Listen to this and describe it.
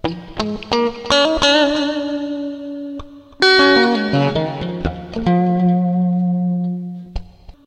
guitar.coda06
a short coda played with Ibanez electric guitar, processed through Korg AX30G multieffect (clean)